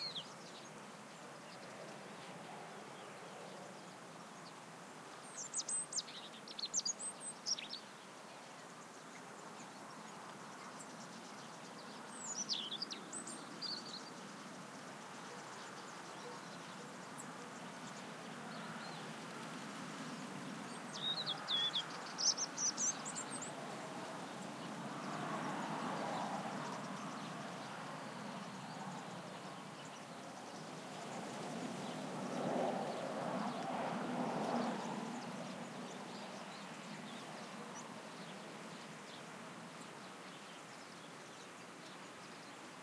sunny morning sounds in the countryside, including bird calls, distant vehicles, cowbells, etc
birds, cattle, ambiance